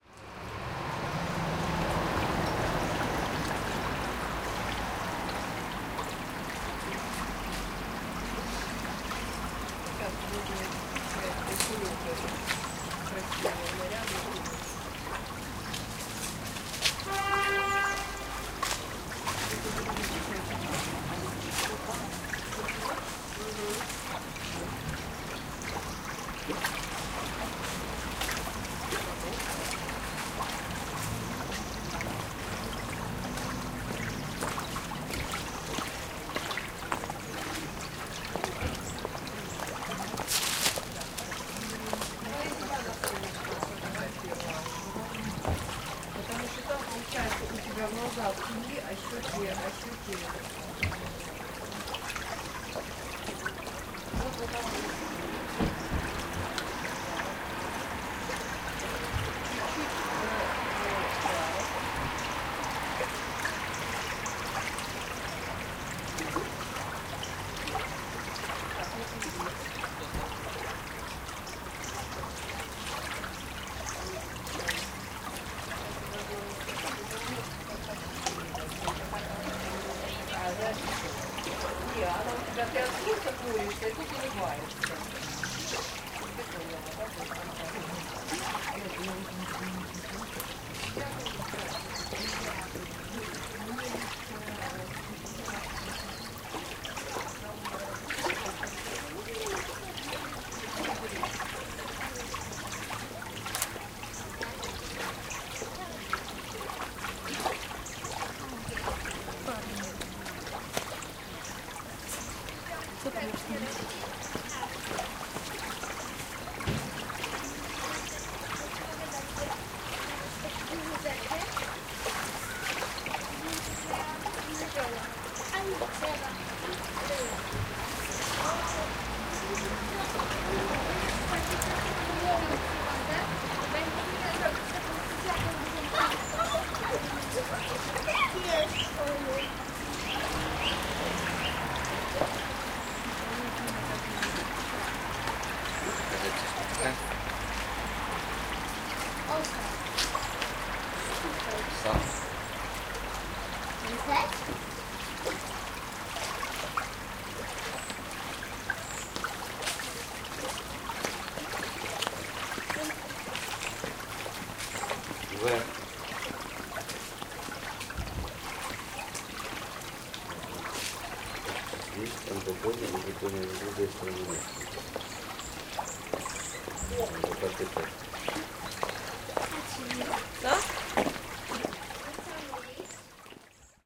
Cieszyn street Wenecja
The ambience of Wenecja str. The sound of a small river Młynówka, city Cieszyn. In the background you can hear cars and a talking family.
October 2021.